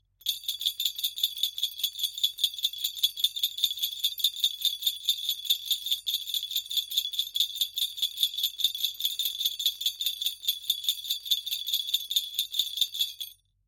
5 bells jingling recorded with H5 Zoom with NTG-3 mic.